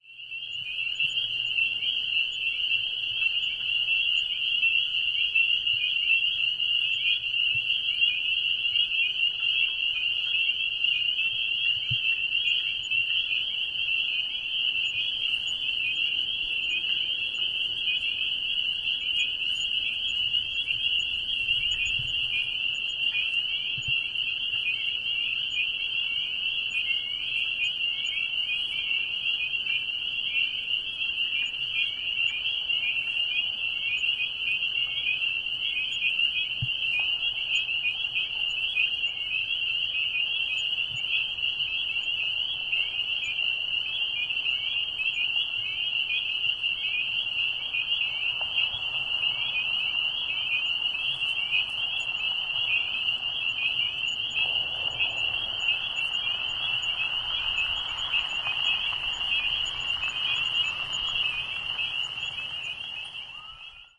EXT peepers MED POV MS
Closer perspective Predominantly Spring Peepers and other frogs, probably Boreal chorus frogs. Active spring wetland at dusk. This is a quad recording. XY is front pair and file with same name but MS is back pair. Recorded with an H2 Zoom. Ends with car noise. (Town Security arriving to check out what I m doing.)
field-recording, frogs, nature, peepers, spring, wetlands